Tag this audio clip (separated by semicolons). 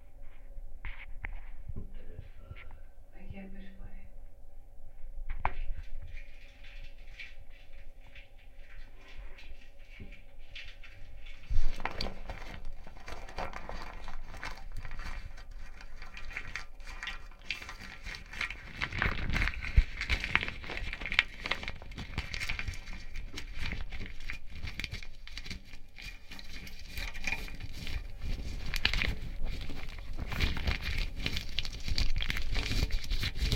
crinkling; crumple; crumpling; hydrophone; paper; rustle; rustling; underwater